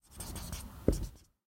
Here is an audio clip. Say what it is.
Writing on a whiteboard.